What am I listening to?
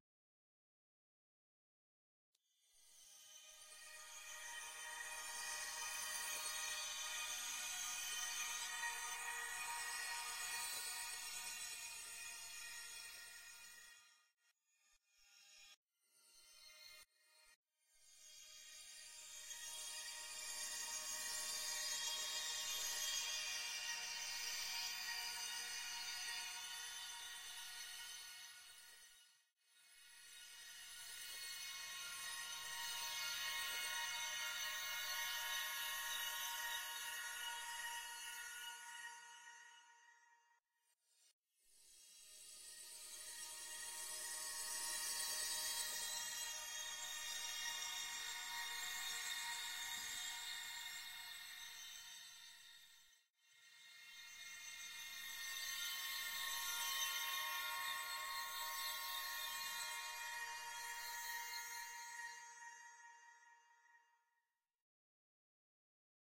sitar in sample edit2
Just some examples of processed breaths form pack "whispers, breath, wind". Comb-filter patch in which a granular timestretched version of a breath is the 'noisy' exciter of the system (max/msp) resulting in a somewhat sitar-like sound. Then played at different (higher) speeds -> sort of stacking...